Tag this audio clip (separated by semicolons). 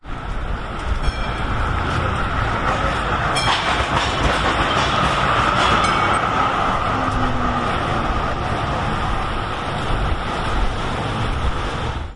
bell; Melbourne; Tram